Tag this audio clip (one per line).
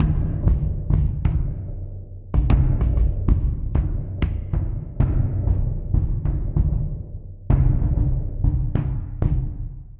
4-4
96BPM
Bassdrum
Groove
Kick
Loop
MrJimX
MrJkicKZ
MrJworks
Wild-Live-Session
works-in-most-major-daws